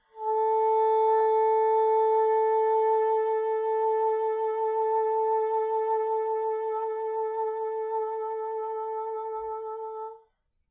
One-shot from Versilian Studios Chamber Orchestra 2: Community Edition sampling project.
Instrument family: Woodwinds
Instrument: Bassoon
Articulation: vibrato sustain
Note: A4
Midi note: 69
Midi velocity (center): 31
Microphone: 2x Rode NT1-A
Performer: P. Sauter
bassoon, midi-note-69, midi-velocity-31, multisample, single-note, vibrato-sustain, woodwinds